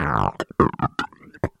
A heavily flanged four-beat beat-box loop.
beat, beatbox, box, flange, loop